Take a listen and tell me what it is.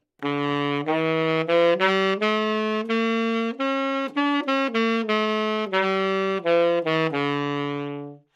Sax Tenor - D minor - scale-bad-rithm-staccato-minor-harmonic
Part of the Good-sounds dataset of monophonic instrumental sounds.
instrument::sax_tenor
note::D
good-sounds-id::6236
mode::harmonic minor
Intentionally played as an example of scale-bad-rithm-staccato-minor-harmonic
Dminor, good-sounds, neumann-U87, sax, scale, tenor